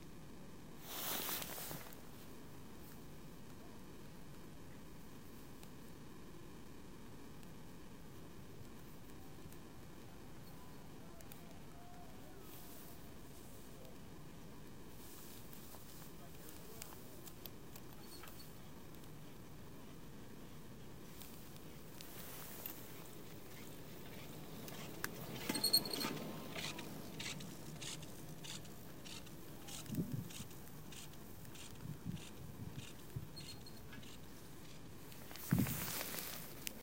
Riding a chairlift at 11am, March 2nd.